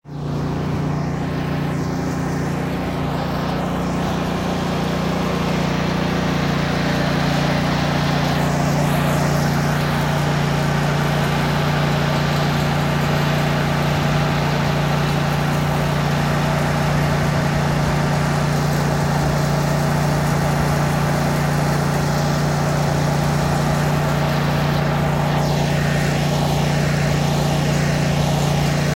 Sound of ambient truck
whirr, car, vroom, truck, machinery